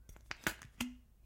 opening a jar 1-2
opening a jar
jar, a, opening